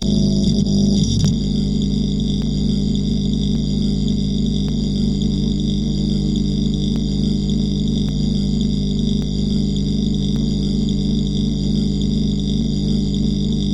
2 dern mix
arc, dull, electric, hum, shok, welder